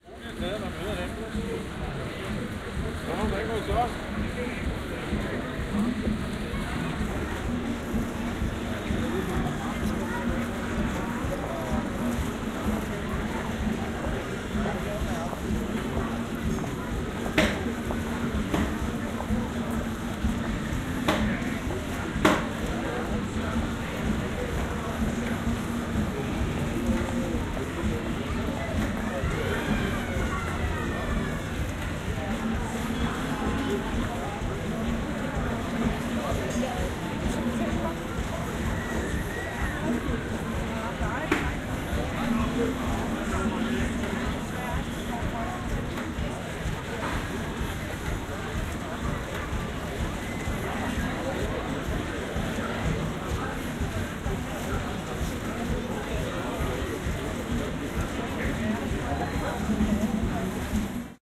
Bakken – the world’s oldest amusemusement park north of Copenhagen, Denmark.
Recordings 24. August 1990 made with Sennheiser binaural microphones on a Sony Walkman Prof cassette recorder.